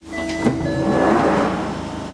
London Underground- train doors opening 02
Two-tone beep and tube-train doors opening. Recorded 16th Feb 2015 with 4th-gen iPod touch. Edited with Audacity.